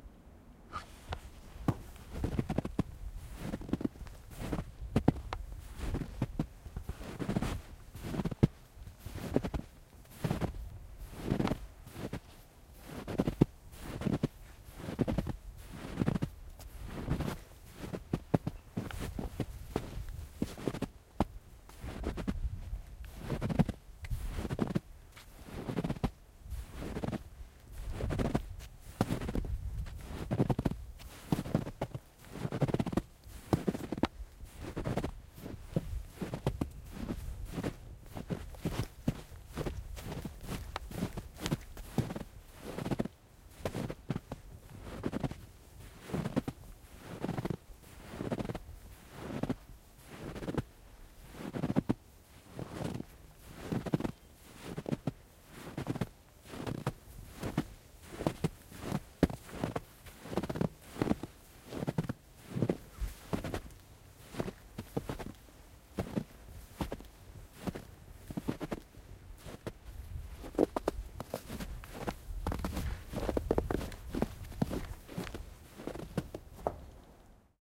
Walking-in-snow-with-boots
boot, snow, footsteps, walk, walking, step, footstep